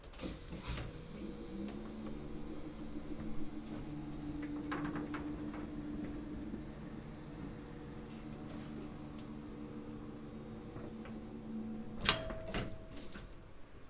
An old library elevator moves to its target floor before its bell is struck.